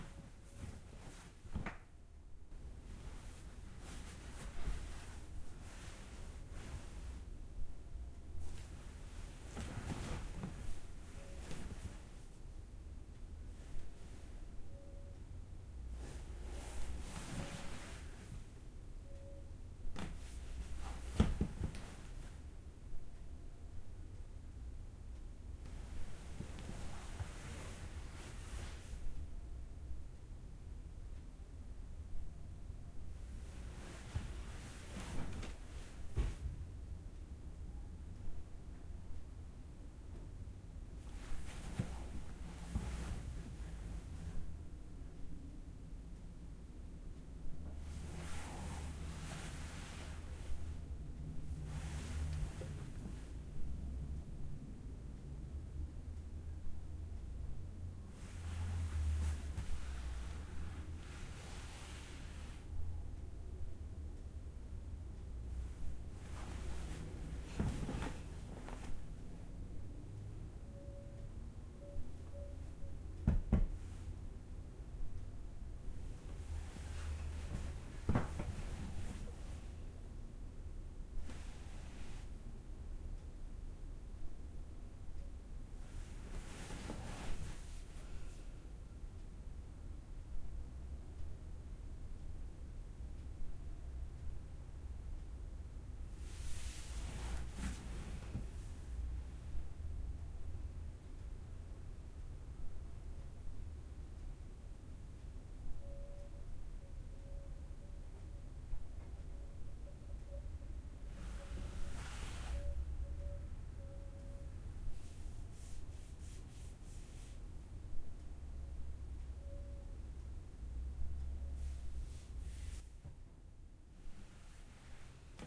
couch rustle 113011
Stereo recording of a person moving around on a couch in a living room. Recorded for an audiodrama in which two people talk while on their living room couch.